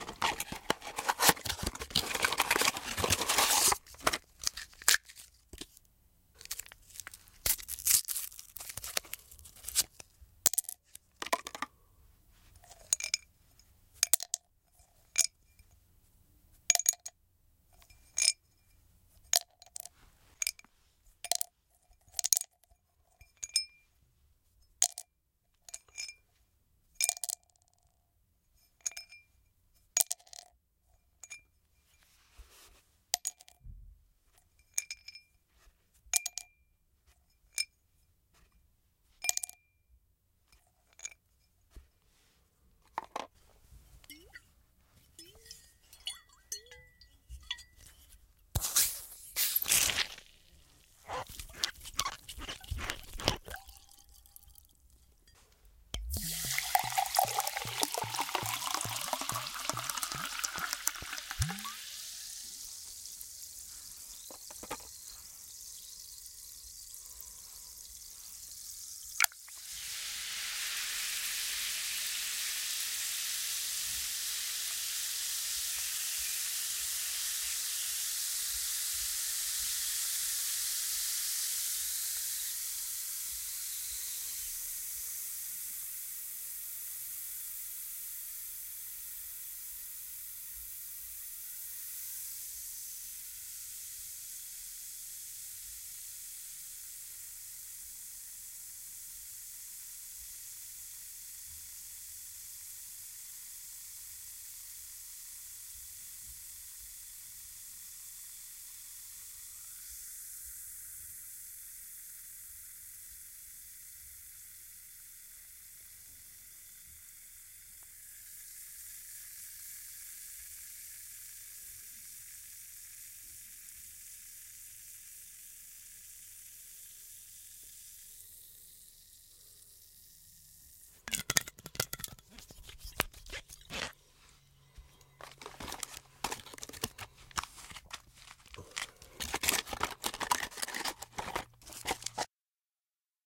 tablet pop-water fizz open-bottle-water bottle open drop bubble fizz bottle-close pill dissolve
Request, drop a pill into fizz water and recorde the disslove.
I recorded the whole process: open the pill, open the bottle, drop the pill several times, fill the glas with pop-water, drop the pill and waiting for dissolve.
pill, tablet, water-bottle, opening, drop, pop-water, fizz, bottle, close, fizz-bottle, open-bottle, open, bubble, dissolve